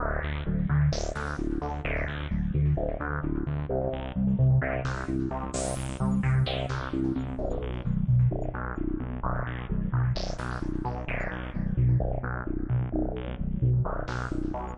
Krucifix Productions against the odds
cinema, film, movie, music, theater, theatre, trailer